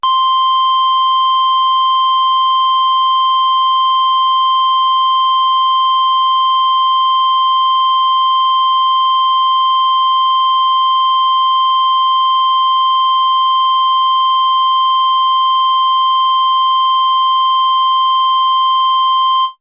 Mopho Dave Smith Instruments Basic Wave Sample - SQUARE C5
basic, dave, instruments, mopho, sample, smith, wave